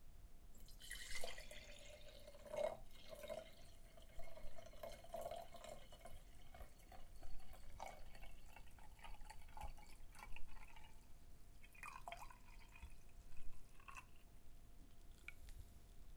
Slow pour from a distance. Slow and light, low.